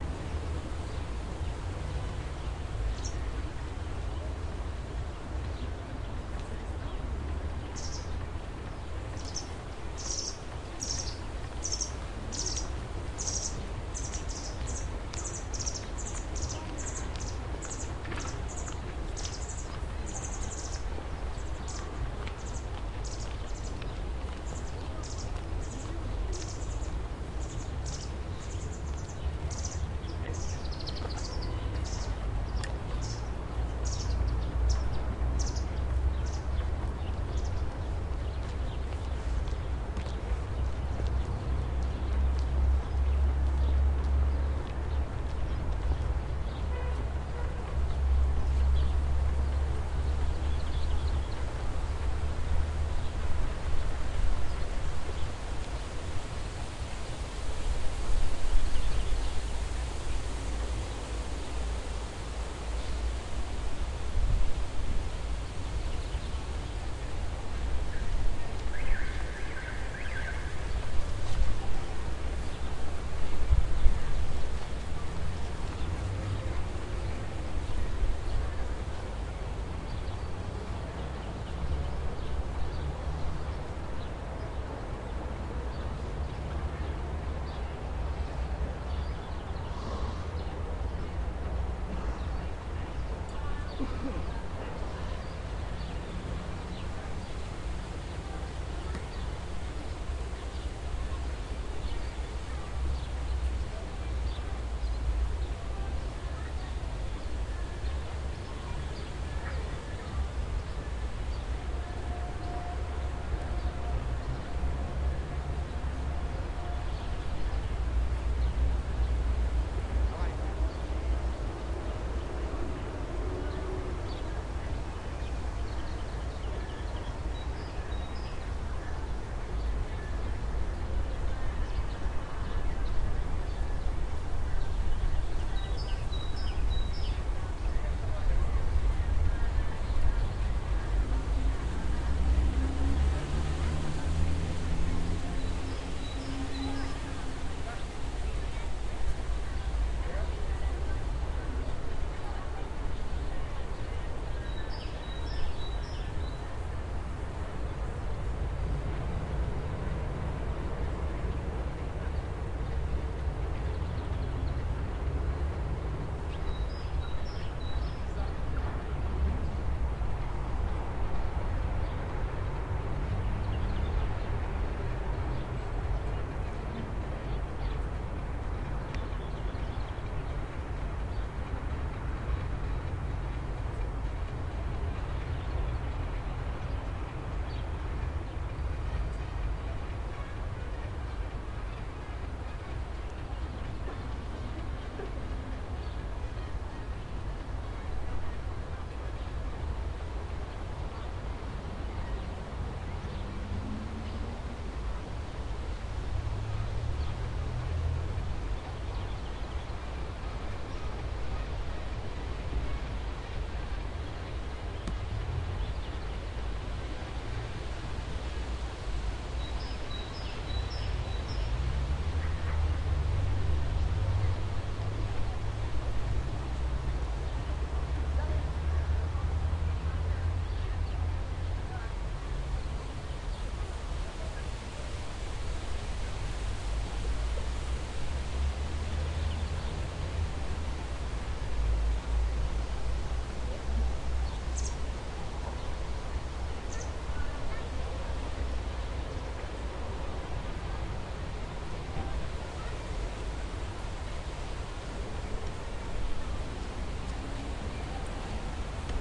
lake 3 3ch
Birds on small lake in the city. Tweet. Noise of leaves. Music from cafe placed near. Rumble of city in the background.
Recorded: 16-06-2013.
XY-stereo + central channel variant.
Tascam DR-40 internal mic + Pro Audio TM-60
It isn't 2.1 sound! It's stereo + central channel which recorded by super-directional microphone.
ambiance, ambience, ambient, atmo, atmosphere, background, background-sound, city, field-recording, lake, leaves, noise, Omsk, rumble, Russia, soundscape, town, trees, tweet, wings